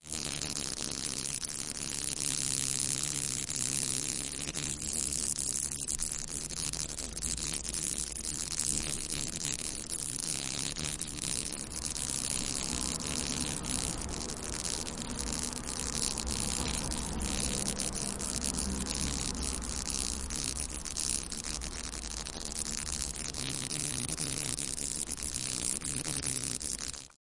The sound of a fly upside down, sort of dying on my kitchen table. Recorded by me on a Tascam DR-05.
die, dies, flies, fly, meurre, mourir, wing